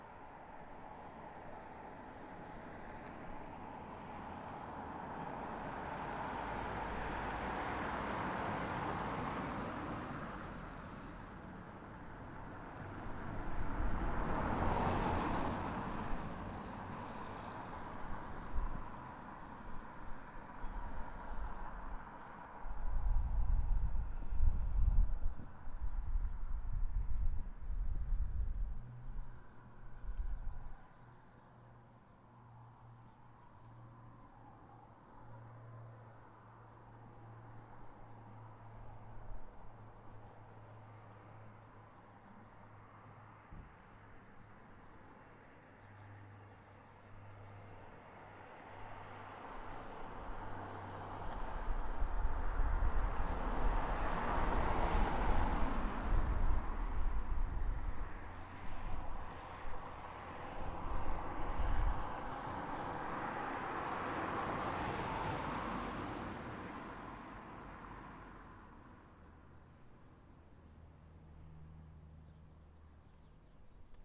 Traffic on Beith Road - Barrmill North Ayrshire
traffic noise recorded on Beith Road, Barrmill village, North Ayrshire Scotland
ambience, birds, cars, field-recording, noise, street, traffic, village, wind